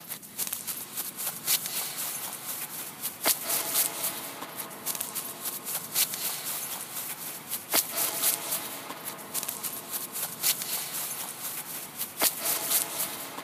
Walking through grass (edit)
industrial, field-recording, edit